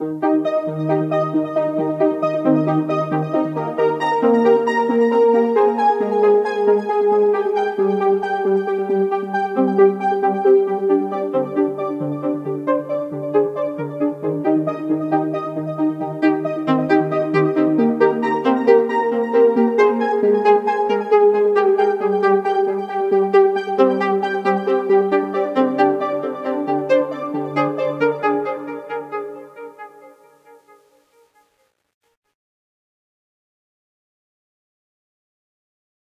and......relax
melodic trance riff i created for one of my songs using a simple patch in sylenth